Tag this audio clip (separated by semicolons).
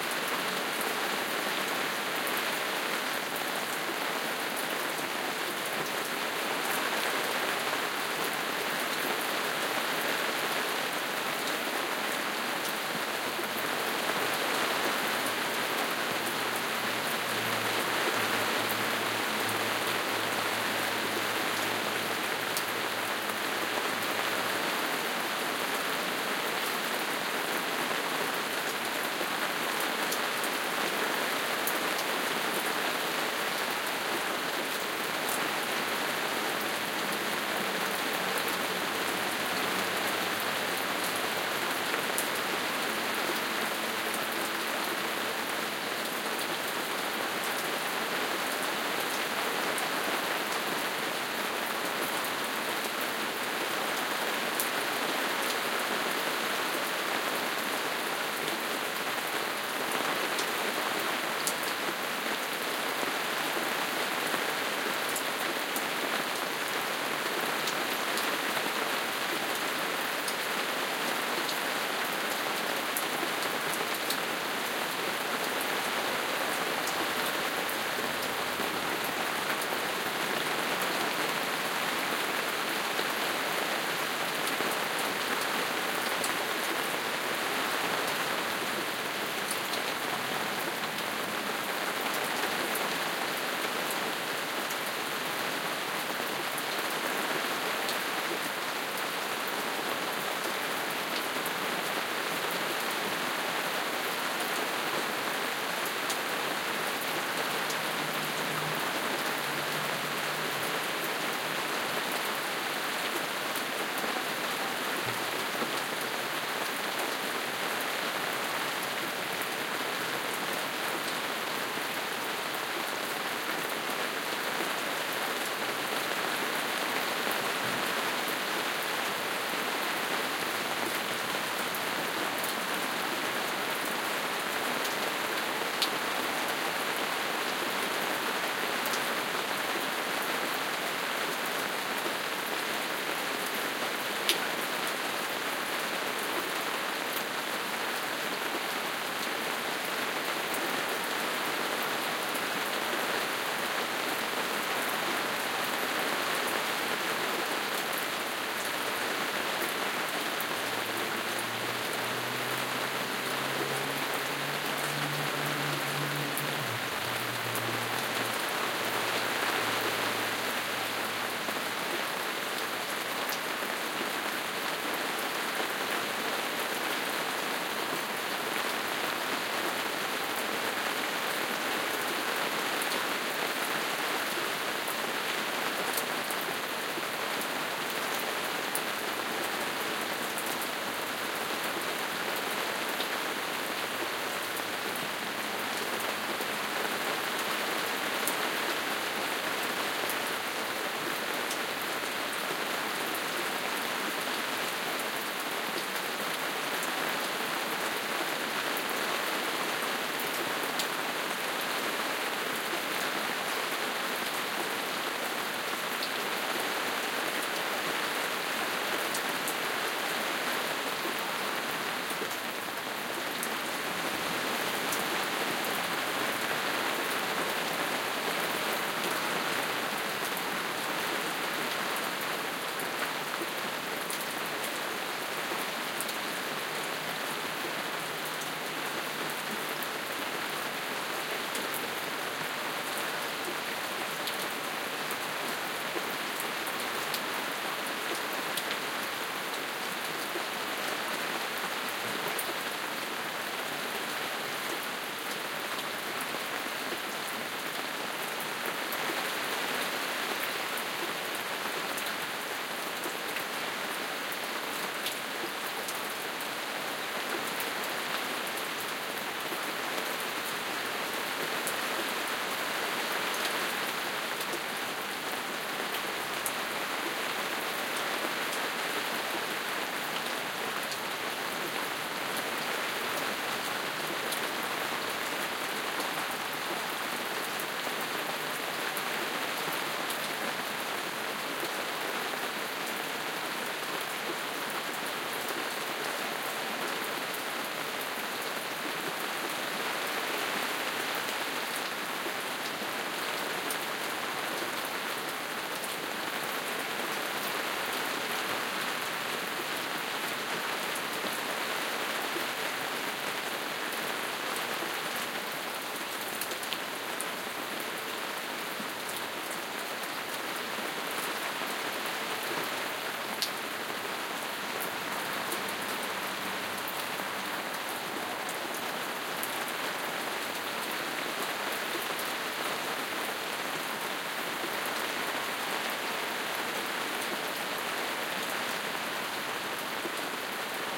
3-D; 3D; Binaural; Classic; Drop; Drops; Field-recording; H6; II; Nature; OKM; Peaceful; Rain; Raindrops; Soundman; Splash; Studio; Water; Weather; Zoom